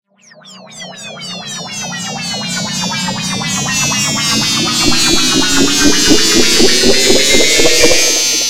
Stab fx for hardstyle, house
Created with audacity
electro, fx, hardstyle, hit, house, stab